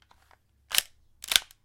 gun click1
recording of a bb pistol reloading- it may be useful to someone but it does have a certain 'plastic' quality to it.